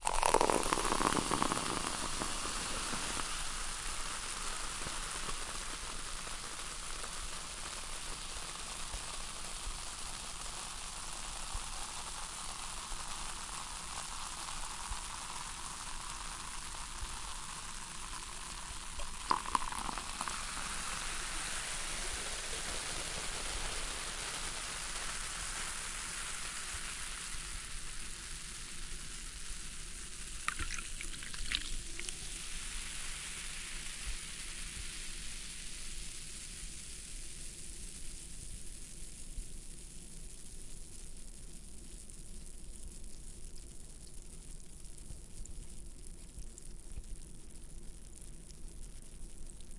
soda pour out in glass
effervescency of gas in glass of soda
glass, hiss, soda, gas, effervescency